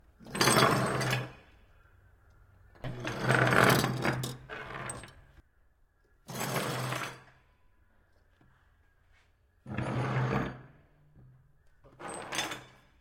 Sliding Metal on Workbench
A few different tools are slid across a wooden workbench.
needle media/Alex Fitzwater 2017
metal, tools, clang, metallic, slide, workshop, tool, workbench, bang, industrial, effect, sound, shed, percussion, foley